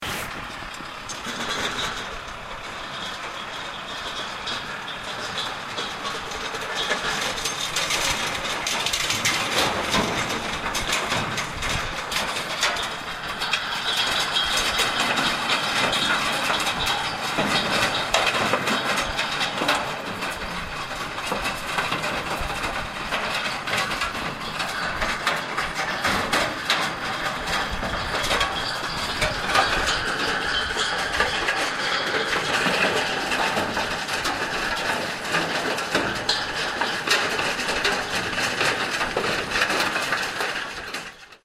Tractor is pulling stone picker in the field. Recorded with Sony ICD-UX200 in windy conditions. Microphone setting "low" Distance approximately 10-15 meters. Very loud sound.
field, recording